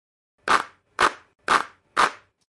Some hand claps I recorded in my studio by layering 48 individual tracks.